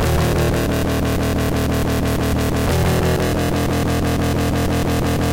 180 Krunchy dub Synths 10
bertilled massive synths
dub, free, massive, bertill, 180, synth